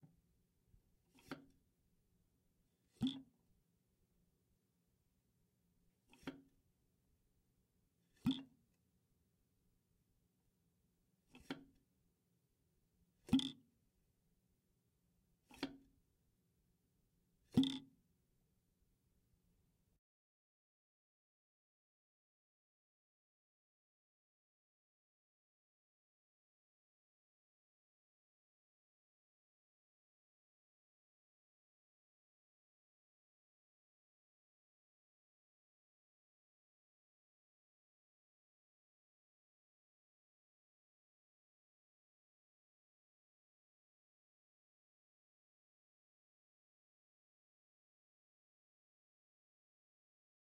untitled sink plug
field-recording, plug